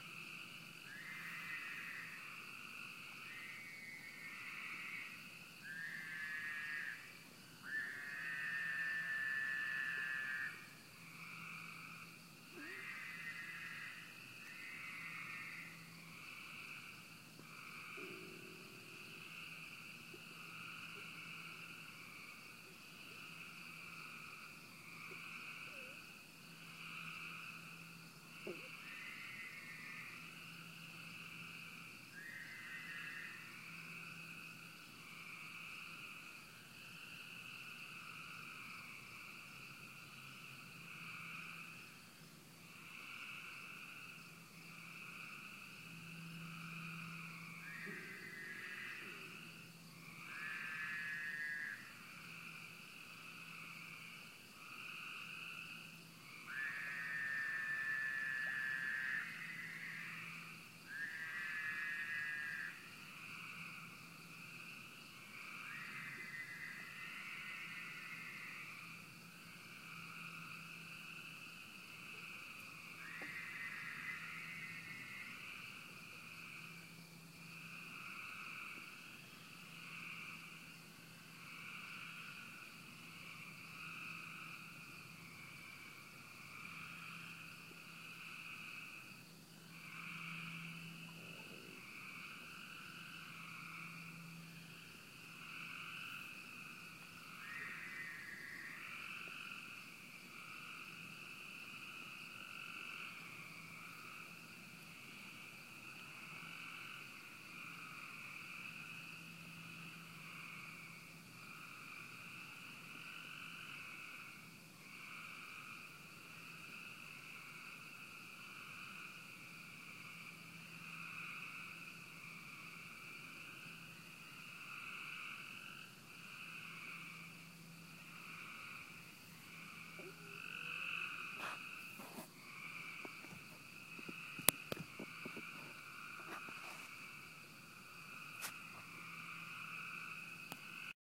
Frogs at Raccoon Lake
Several different species of frogs croaking and droning. Recorded with my iPhone at Raccoon Lake near Centralia, Illinois at night. There is a big splash near the beginning from something in the lake, which gives it more of an air of mystery, I think.
splash, night, field-recording, mystery, frogs, nature, ambiance, lake